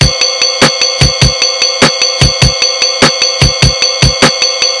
slow rock drum-loop sampled from casio magical light synthesizer
drum, loop, rhythm, percussion-loop, beat, dance, percs, magicalligth, casio, drum-loop